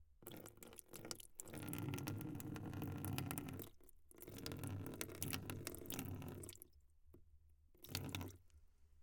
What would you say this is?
Drip recorded in an anechoic chamber using a Studio Projects B-1 LDM into a MOTU 896. Unprocessed.
The drips are coming from a bottle about 30 cm above a plastic bucket.
The bottle being unloaded in a couple short bursts. Some single drops some
quick successions. Bucket acoustics clearly identifiable, low rumble
impact. More bucket sound then plastic or water.